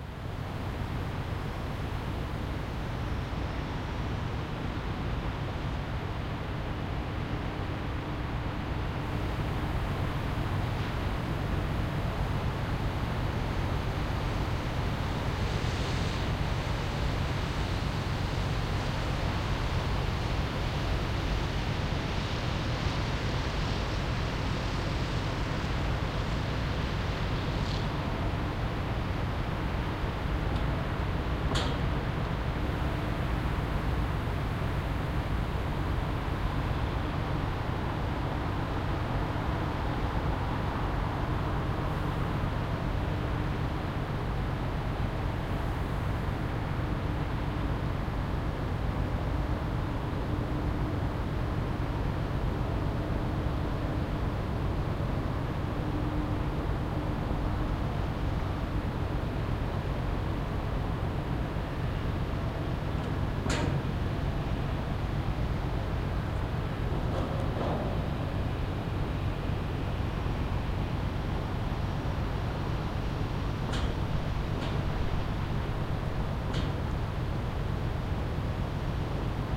This is a recording of a ship as it lies secured to the pier in the port of Antwerp at night. All lit up quietly humming and pulling the ropes. Notice a resonant tone at 300Hz that continuously fades in and out. Recorded with a Pearl MSH 10 mid-side stereo microphone to a Sound Devices 702.
machine, industrial, ship, outdoor, ambient, noise, harbor, machinery, drone, field-recording